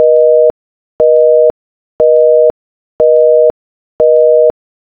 A busy signal, made from scratch.
busy, dtmf, signal